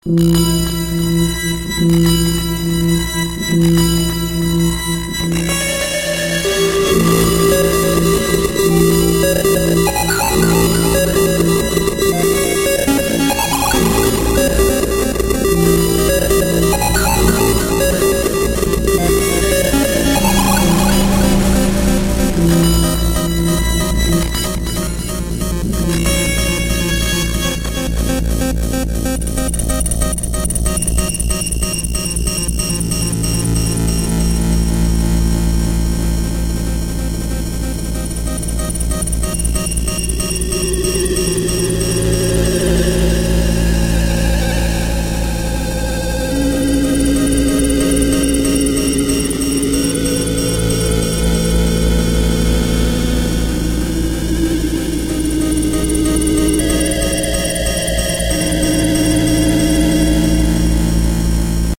experimental 8 bit audio research
Old experiments using a simple 8 bit VST controlled by a midi keyboard. Recorded in real time by messing around with my effects rack in my DAW, changing effect orders on the fly, disabling and enabling things etc. Very fun stuff :D